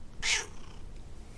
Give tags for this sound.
cat; meow; scrowl

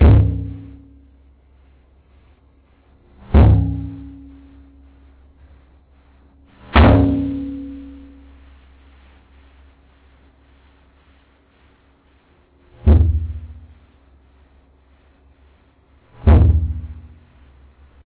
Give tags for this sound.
bathtub; hitting; inside; outside

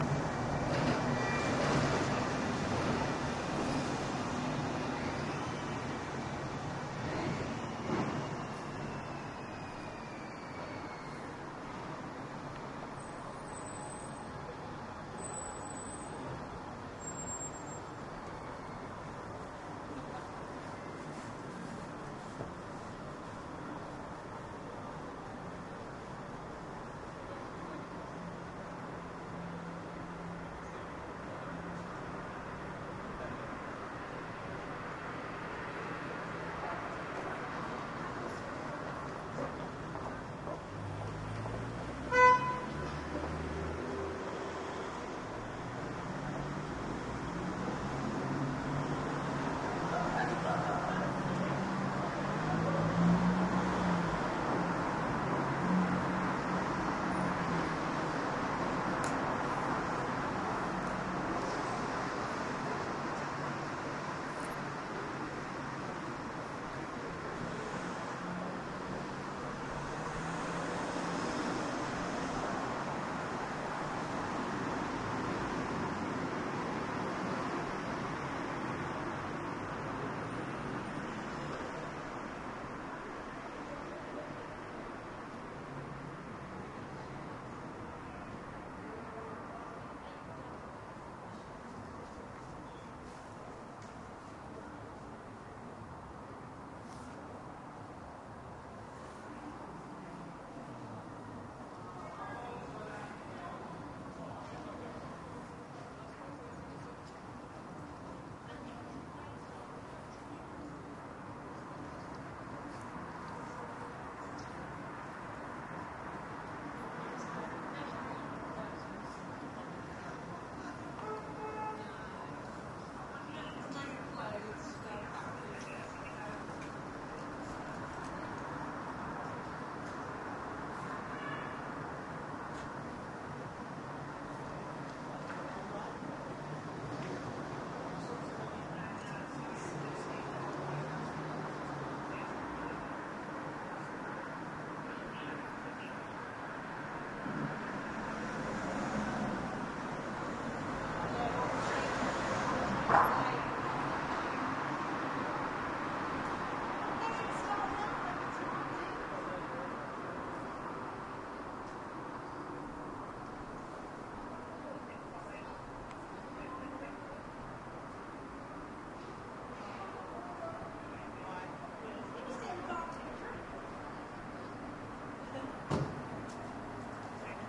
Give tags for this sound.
ambience; cars; city; field-recording; main-road; people; road; street; urban

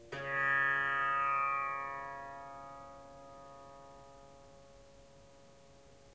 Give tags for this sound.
bass; tanpura; tanpuri; tanbura; ethnic; indian; swar-sangam

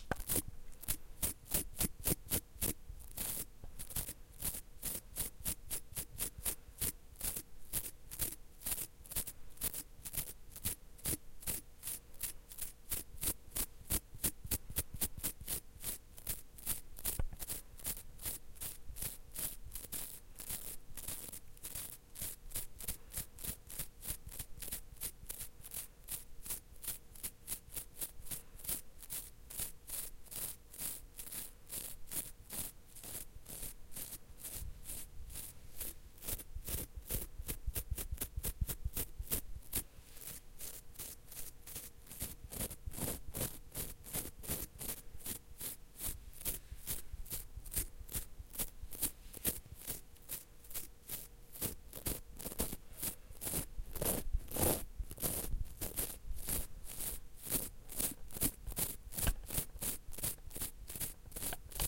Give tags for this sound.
playing-with-toothbrush; toothbrush; toothbrush-bristles

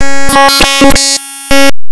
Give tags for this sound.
computer; loud; noisy; lo-fi; glitch

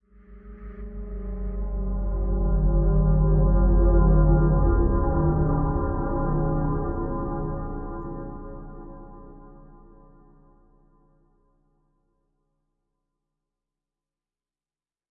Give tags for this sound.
Dark; Atmosphere; Sound; Horror; Scary

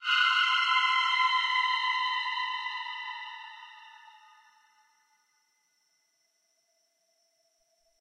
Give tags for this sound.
collab-1; cry; eerie; haunting; pad; scream; seagul; stretched; vocal; voice

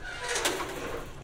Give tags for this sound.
sample; gate; recording

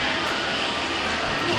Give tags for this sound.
field-recording; loop; ocean-city

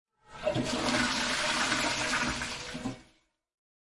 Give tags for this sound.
CZ; CZECH; PANSKA

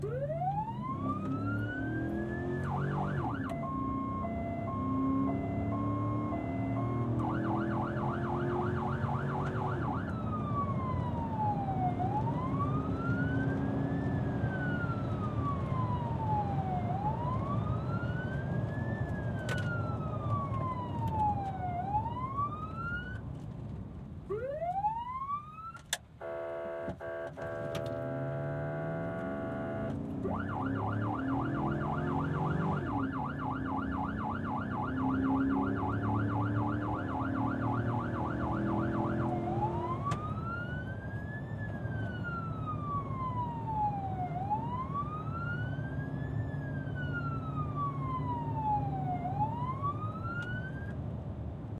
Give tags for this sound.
cop; on-board; police; cruiser; interior; engine; rev

